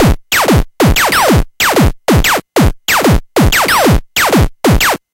Beats recorded from the Atari ST